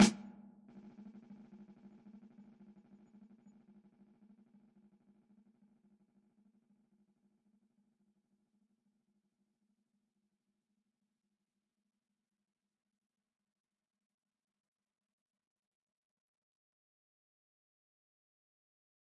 EFX Snare 1
A dry snare with effects.